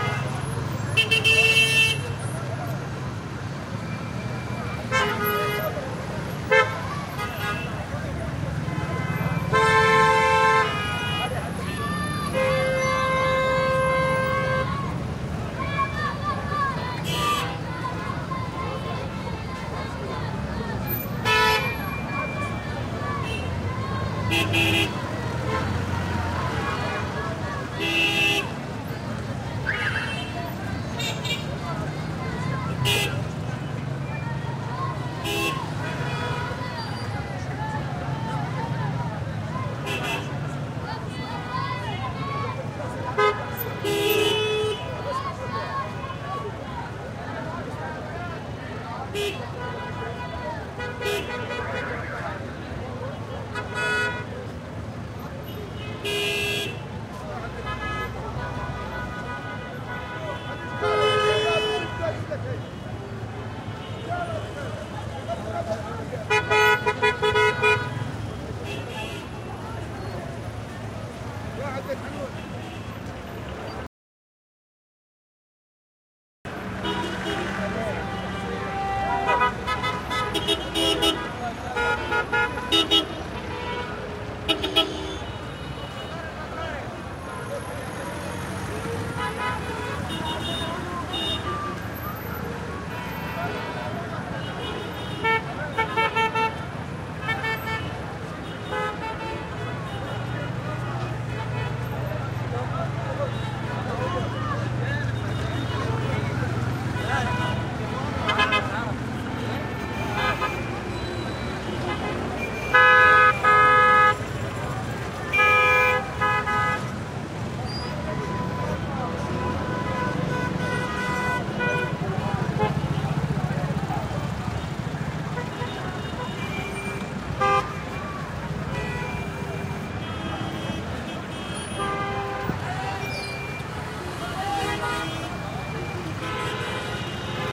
traffic heavy slow Middle East gridlock throaty motorcycle engine bass horn honks annoyed Gaza 2016
East, gridlock, heavy, honks, horn, Middle, motorcycle, slow, traffic